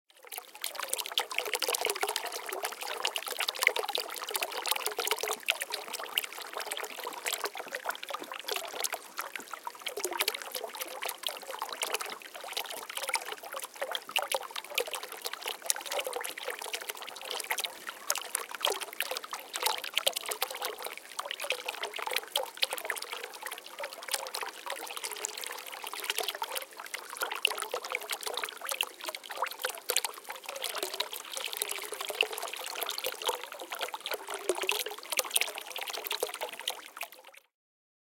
Medium Speed Dropping Water 2
Single Small Fountain recording, with Zoom H4
liquid, fountain, flow, river, brook, meditative, trickle, gurgling, shallow, flowing, gurgle, relaxing, babbling, waves, trickling, relaxation, water, creek, field-recording, bubbling, ambient, stream, splash, nature